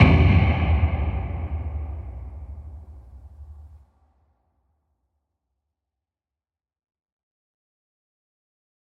Horror Stinger 12
This stinger is best suited to horror contexts.
It could be used to support the appearance of the threat or support an anxiety inducing occurrence.
Low-frequency metallic thud and rumble; mid-frequency and high-frequency metallic hit.
Designed sound effect.
Recording made with a contact microphone.
anxiety, danger, eerie, fear, fearful, hit, horror, impact, killer, metal, metallic, scare, scary, stinger, threat, thud, unsettle, unsettling